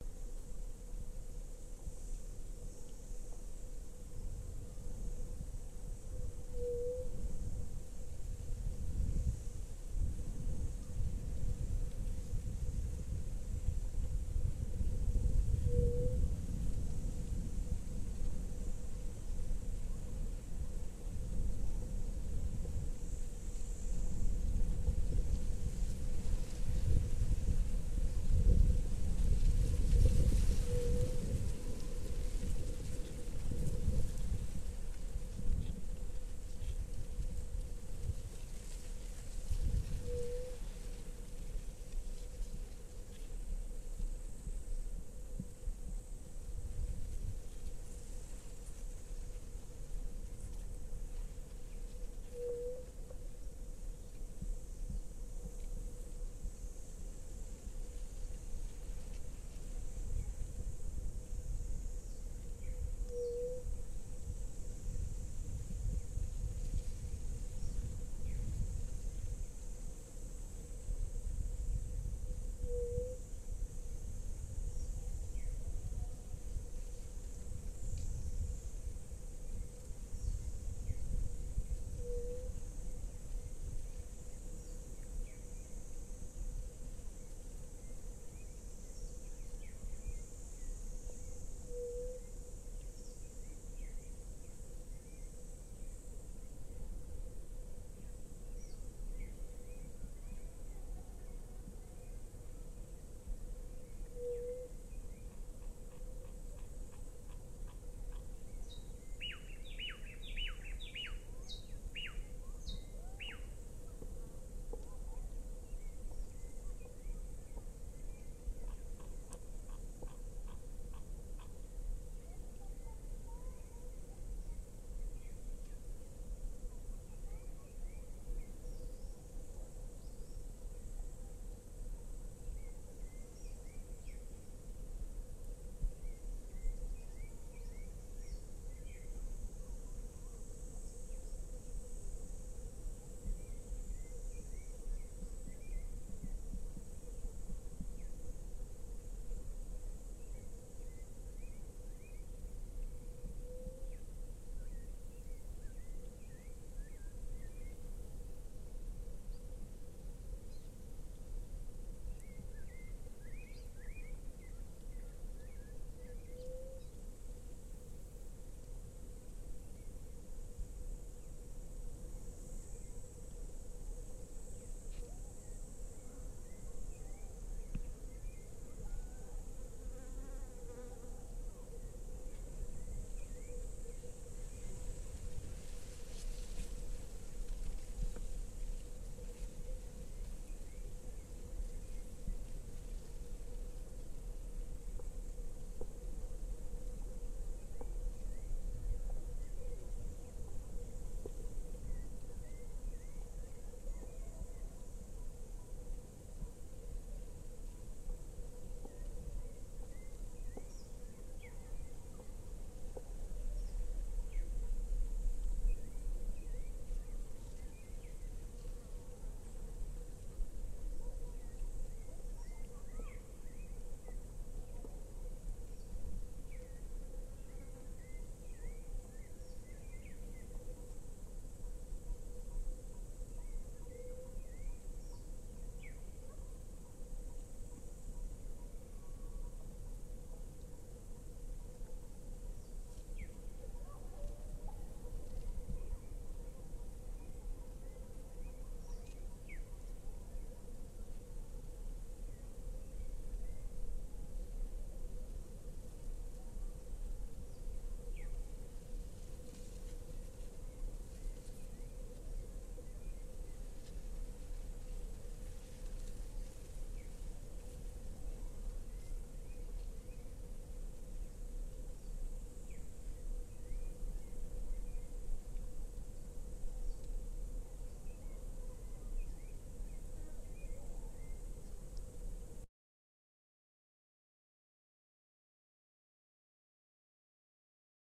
This is an ambient background sound made in the tropical rain forest from the venezuelan Amazonas at noon (12:00m), there you can listen to some exotic bird singin, some early cicades and wind striking moriche treepalms. Recorded with the Roland Edirol r44 int stereo mic.